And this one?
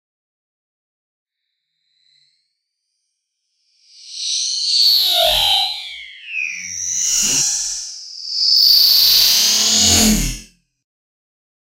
PHASY, METALIC FLY-BY. Outer world sound effect produced using the excellent 'KtGranulator' vst effect by Koen of smartelectronix.
sound-effect, horror, processed, sound, effect, sci-fi, fx